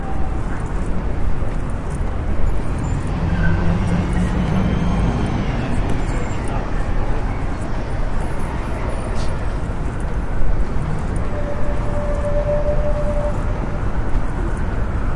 City Sidewalk Noise with Electric Motor & Horn
field-recording, nyc, horn, car, new-york, beep, city, sidewalk, electric, motor